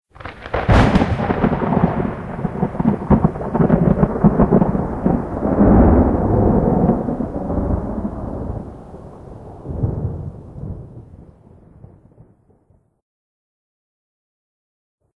Thunder Clap 3
Single thunder clap.